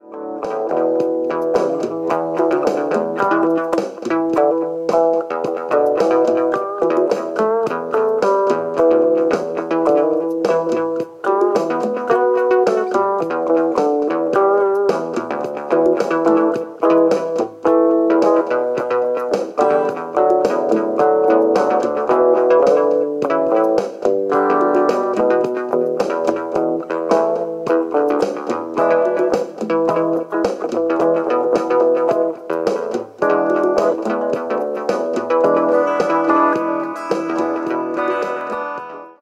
Korg Volcakeys, Boss Dr3, Yamaha Pacifica electric, Muslady mini-mixer (delay), recorded directly to my LG Smart Phone
guitar, mood, synth